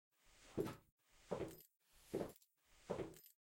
A simple set of four footsteps which can be edited to loop.